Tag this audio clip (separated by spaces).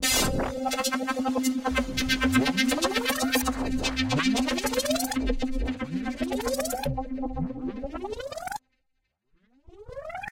Beam Dance Distorted FX Game Laser Processed Psytrance Sample Trance